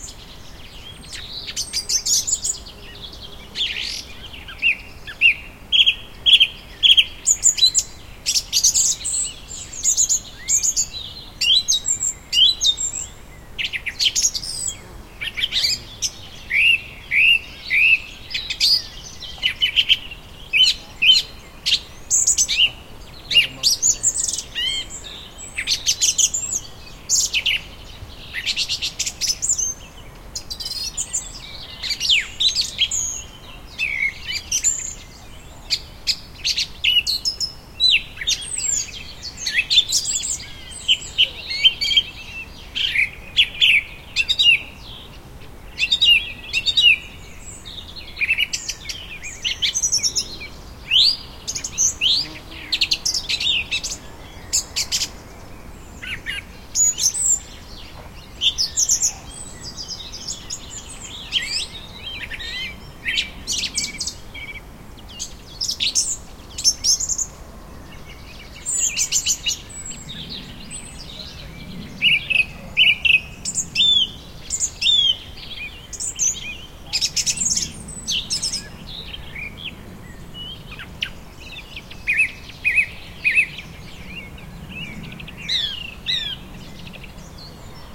140809 FrybgWb CompostHeap Evening R
A summer evening in a vineyard by the German town of Freyburg on Unstrut.
The recording abounds with natural background noises (wind in trees, birds, insects).
The recorder is located next to a compost heap at the bottom of the vineyard, facing across the valley below.
These are the REAR channels of a 4ch surround recording.
Recording conducted with a Zoom H2, mic's set to 120° dispersion.
summer, field-recording, nature, ambiance, atmosphere, rural, Unstrut, ambient, Freyburg, 4ch, vineyard, surround